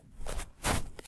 Jump, landing in snow01
Landing in the snow after a jump.